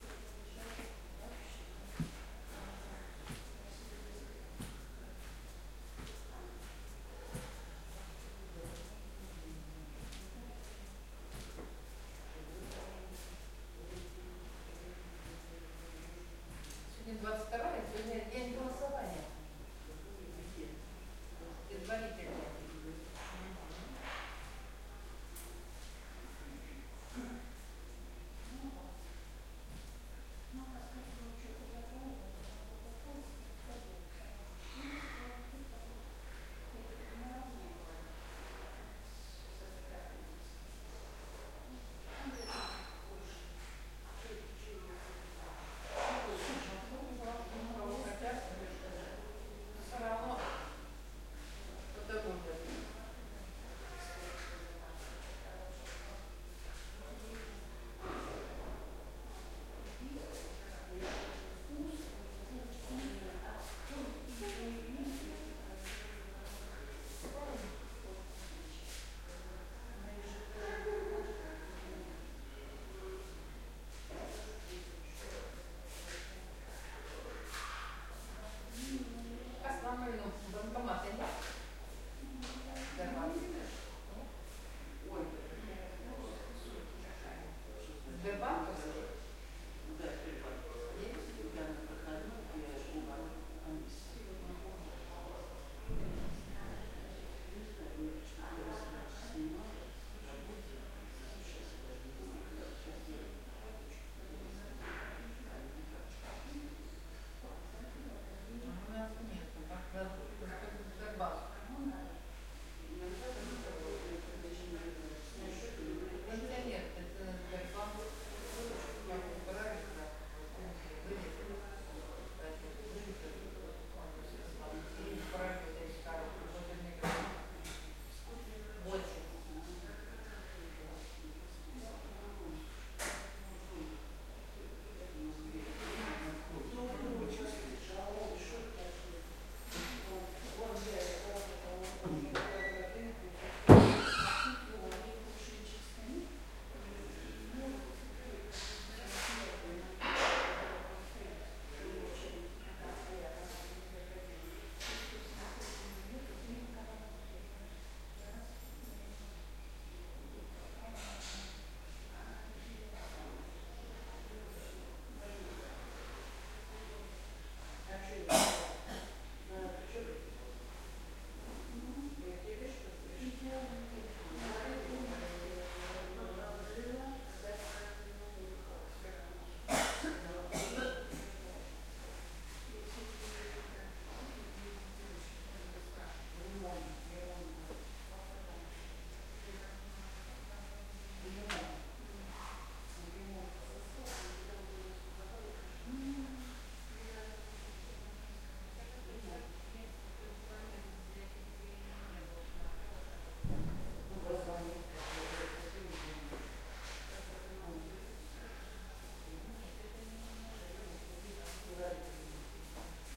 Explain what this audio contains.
Suburban hospital ambience - Moscow region, mostly old ladies & gents calmly talking and walking around, door closes, general noises XY mics
Suburban hospital ambience - Moscow region, mostly old ladies & gents calmly talking Russian language and walking around, door closes, general noises
Roland R-26 XY mics
background, hospital, general-noise, Russia, Russian, ambience, old-people, people, elder-people